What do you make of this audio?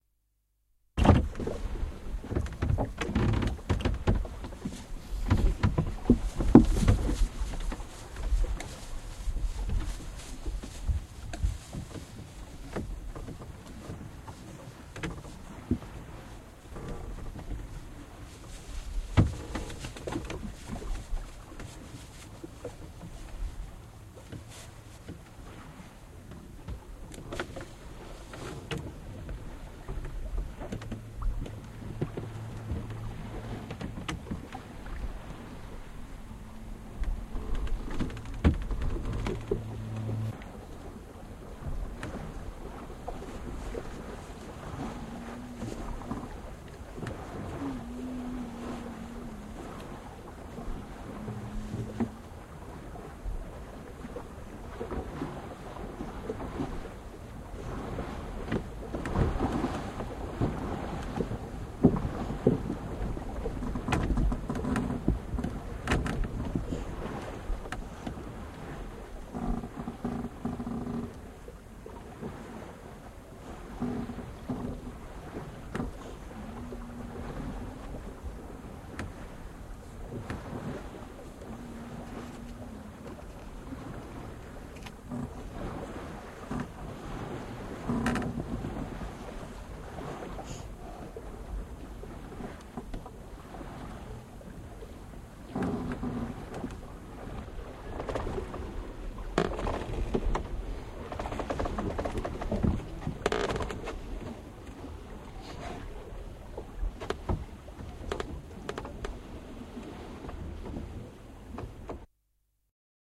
Dhow sailing on Indian Ocean. Lamu, Kenya. Wood squeezing. Waves.